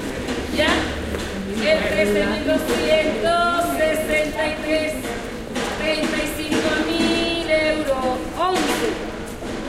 female voice selling lottery tickets in Sierpes St, Seville. Recorded with Olympus LS10, with internal mics. This sound was registered during the filming of the documentary entitled 'El caracol y el laberinto' (The Snail and the labyrinth), directed by Wilson Osorio for Minimal Films